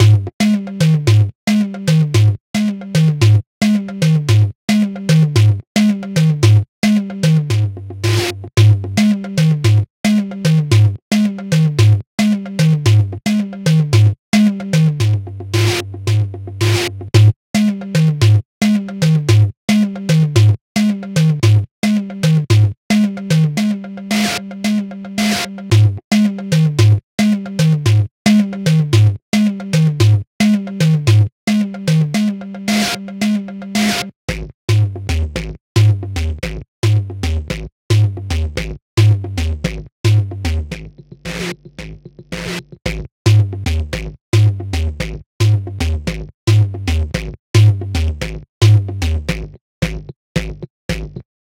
FAT SQUAD FULL LOOP
112, bass, bpm, cumbia, heavy, loop, moombahton